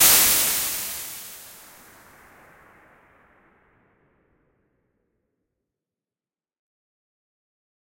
Artificial Cave Impulse Response
An impulse response made and edited in Audacity. I was originally trying to make an outdoor IR, but it ended up sounding like a cave or tunnel. If you're interested, you may want to check out my Impulse Response Pack for more IR's. Thank you!
Artificial, Tunnel, IR, Reverb